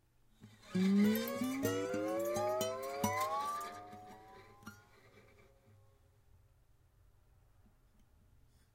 Horror Guitar. Confusion.